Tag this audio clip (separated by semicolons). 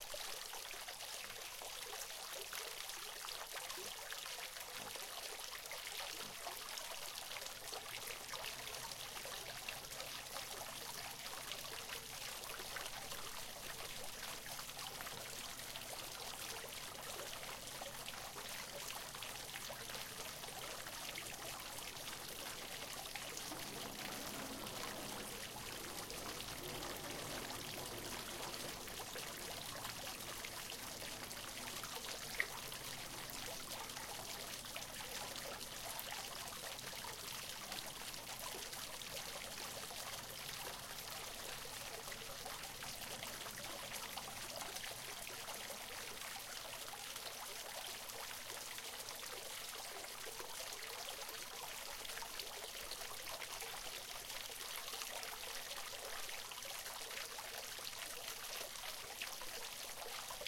bubbling; flow; garden; humming